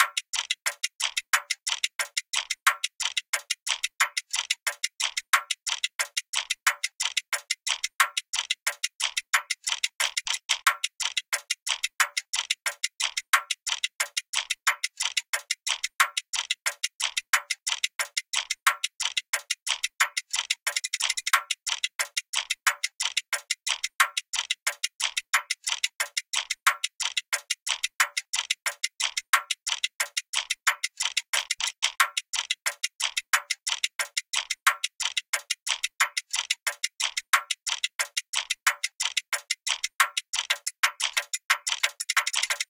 Clockwork-loop-16bars
I needed for one of my upcoming tracks a drumloop that would fit in a feeling of the stressed times we live in.